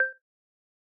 Beep 05 single 2015-06-21
a sound for a user interface in a game